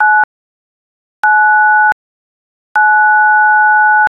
The '9' key on a telephone keypad.
dial, nine, 9, telephone, button, keypad, tones, dtmf, key